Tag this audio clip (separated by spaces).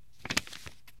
fold folding origami paper rap wrap wrapping wrustle